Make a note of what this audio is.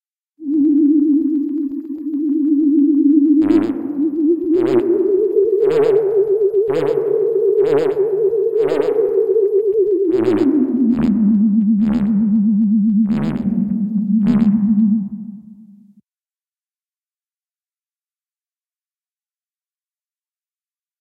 flying saucer with probably some malfuntions
outerspace, alien